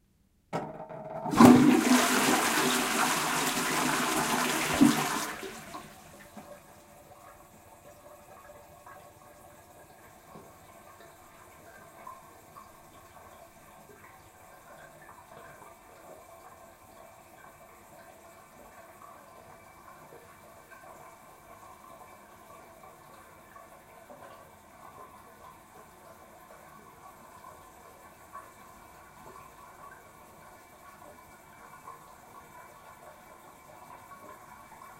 flushing the toilet and refill water
Flushing the toilet, and waiting for the water to refill
bathroom, dass, do, flush, flushing, load, toalett, toilet, washroom, water, WC